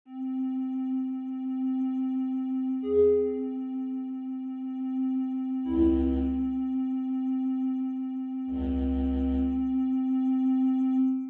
Drone-1-Tanya v
ambient, clarinet, depressive, drone, electronic, flute, for-animation